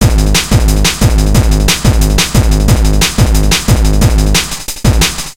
Break180BPM2
A set of Drum&Bass/Hardcore loops (more DnB than Hardcore) and the corresponding breakbeat version, all the sounds made with milkytracker.
bass, hardcore, drum